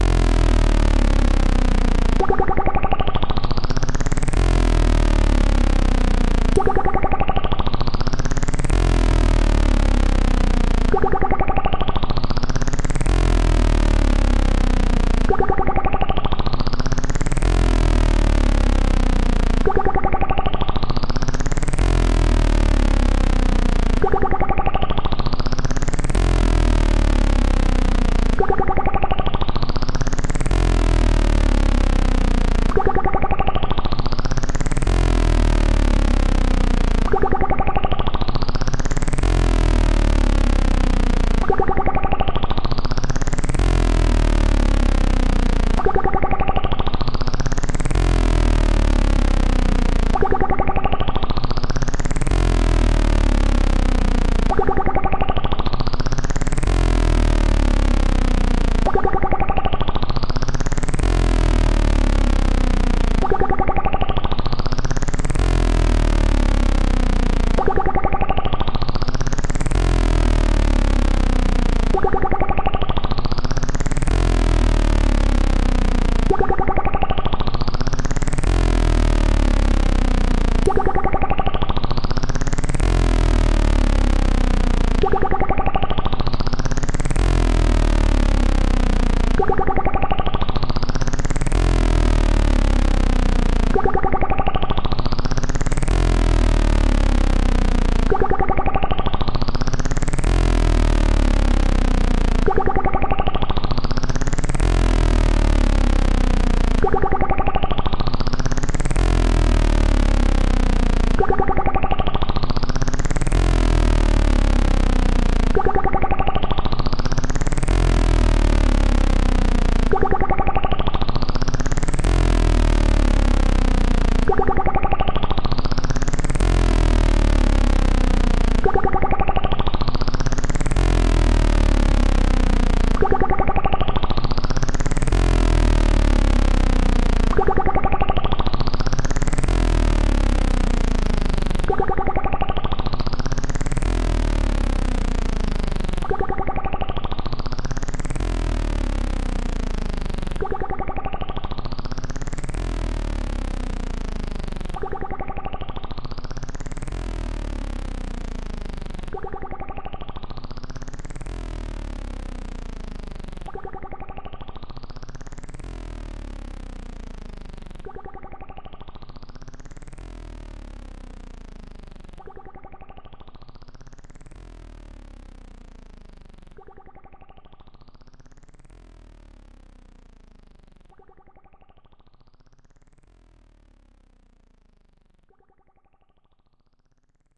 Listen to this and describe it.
Pro One Sound Texture 1.1
Sequential Circuits Pro One (analog synthesizer) self modulating